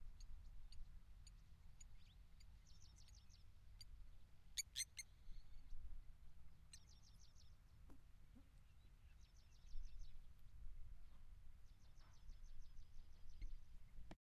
Various birds in a big open space. Lots of options to choose and cut from.
OWI, away, flying, wings, forest, cawing, bird, nature, birdsong, ambience, ambient, birds, spring, field-recording, animals